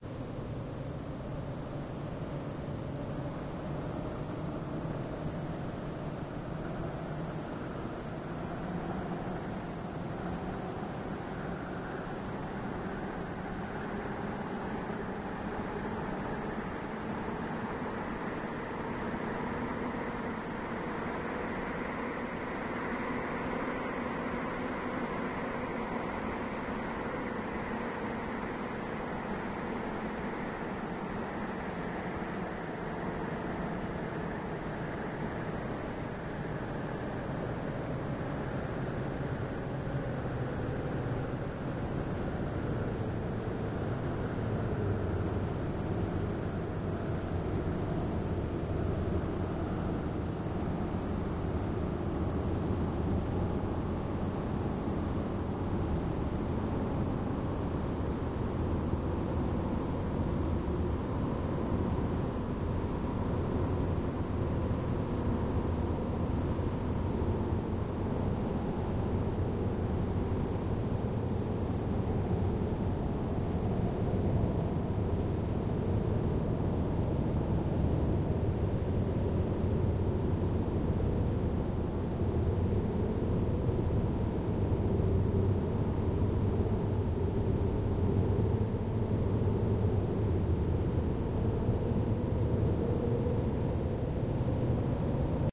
computer generated sound
ambient, computer-generated-sound, effects, wind